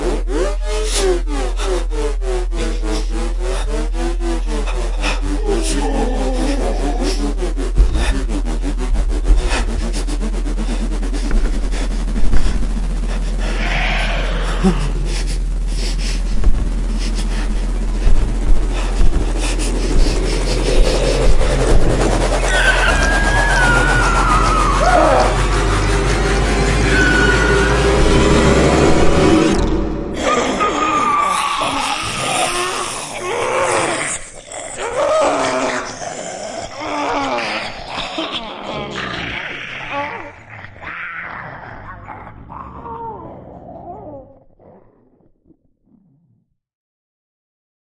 Death by Zombie
Picture a zombie survival game. The player is cornerned, realizing that there's nowhere left to run. It's a matter of seconds before the zombies find the player and tear him to pieces. As the seconds pass, the filthy, coughing zombies start noticing someone's there and as tension builds up, the player is cornered and eaten alive to the tune of snarls, shrieks, screams and growls while the "game over" screen slowly fades to black. "Damnit, again?!" you scream as you have to backtrack through hours worth of this nail-biting level.
All field/foley sounds were recorded with either my Zoom H1 or my RODE NT-2A. Some sounds were synthesized. Everything was put together in FL Studio.
death, disturbing, game, gameover, horror, monster, pain, permadeath, shriek, survival, voice, Zombie